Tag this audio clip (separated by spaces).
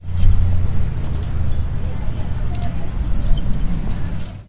keys security-man campus-upf UPF-CS12